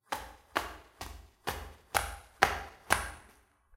Slippers walking on a tiled floor, used for walking a stairs.